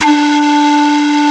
A combination of different Samples (Kawai K1 and Roland Fantom). The result is this creation. Loopstart is at 30455.